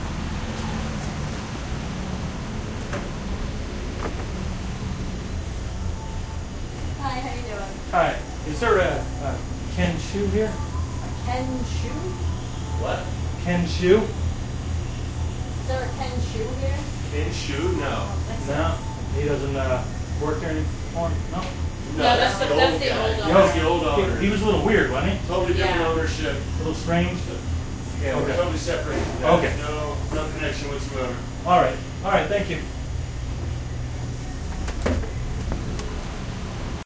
Sounds of the city and suburbs recorded with Olympus DS-40 with Sony ECMDS70P. Inside a pizza joint looking for the elusive "kenshu".
pizza, restaurant, field-recording